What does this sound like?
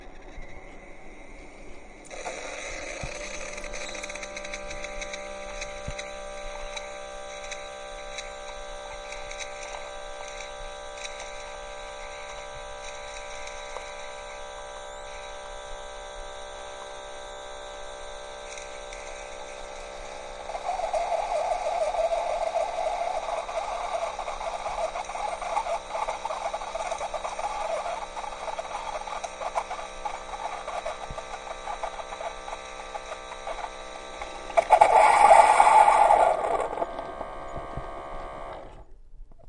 Recording of a Keurig single serve brewing coffee.
Created using an HDR sound recorder from MSU.
Recorded 2014-09-13.
Edited using Audacity.